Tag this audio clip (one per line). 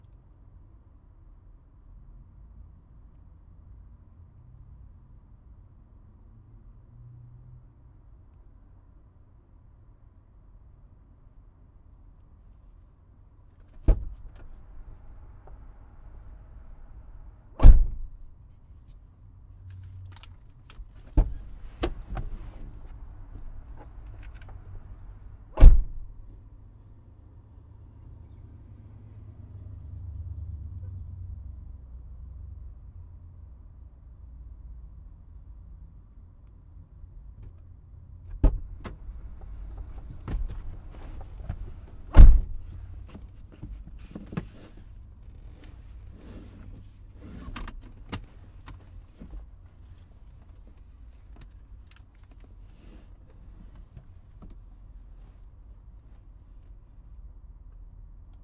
car-interior
getting-in-car
belt
door-slam
seat
car-door